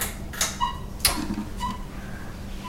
A noisy ceiling fan.